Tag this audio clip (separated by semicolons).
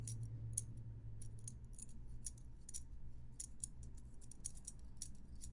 foley; mover; mw3; sonido